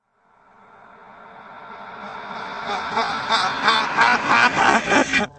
Reversed and negative laughter from a haunted appliance, like a TV or a microwave.